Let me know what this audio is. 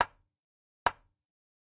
Switch Off
Created with: Music Forge Project Library
Software: Exported from FL Studio 11 (Fruity Edition)
Recording device: This is not a field recording. (Some VST might have)
Samples taken from: FL Studio 11 Fruity Edition
Library:
(Scores are now included in the patcher)
Patcher>Event>Switch>Light Switch
Set To "Off"
Switch, Light, Event, Off, MFP, Music-Forge-Project